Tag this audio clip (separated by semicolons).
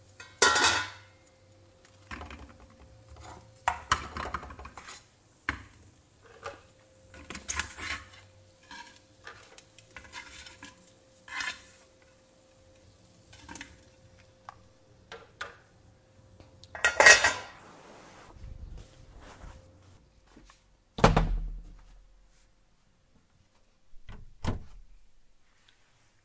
noisykitchen; cooking; kitchen; cook; pot; pots; pan; chef; cocina